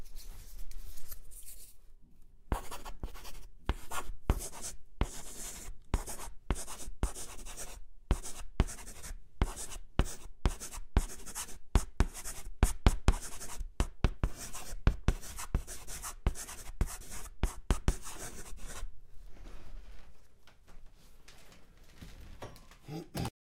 writing charcoal board
Writing on a chalk board. recorded with Rode NT1000
writing, blackboard